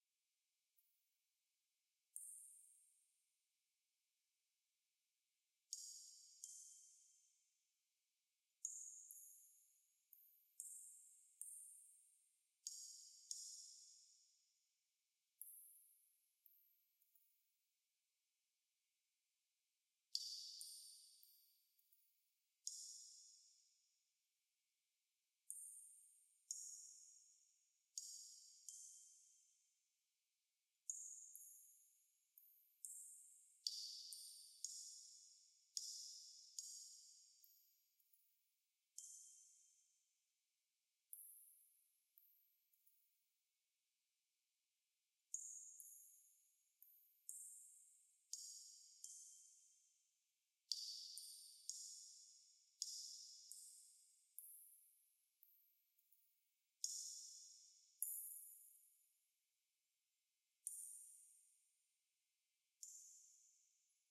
Water Dripping (Large Echo)
Artificial cave ambiance created by dripping water into a coffee mug and adding reverb in DAW.
Recorded with a Sure KSM27
chamber, water, cave, reverb, ambiance, wet, drip